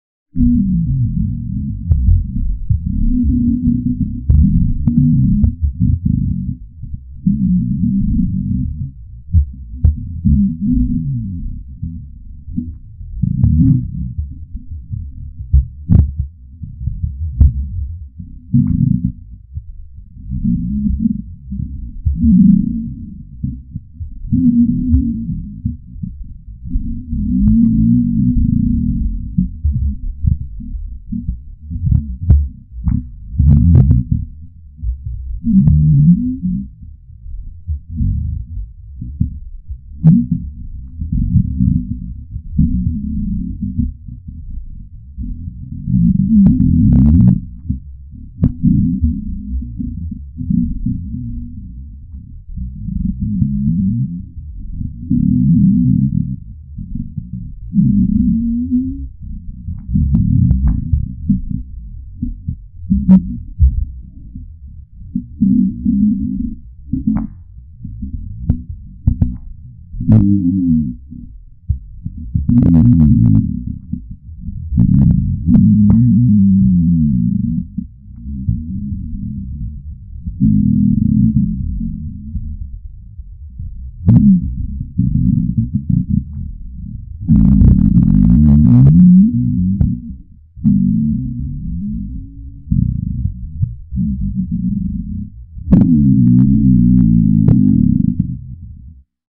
Intensive Hunger Moans & Deep-Pitched Grumbles of My Stomach
Ooooooouuuuugh!!! My stomach is now becoming monstrous, and more intensive because I was now waiting for 225 hours to eat, and I'm feeling even more hungrier than I already am!
sound, growling, moans, starvation, rumbles, borborygmi, grumbles, females, roar, stomach, roaring, sounds, belly, starving, borborygmus, rumbling, hungry, recording, moan, growls, tummy, grumble, moaning, soundeffect, rumble, growl, humans, grumbling, roars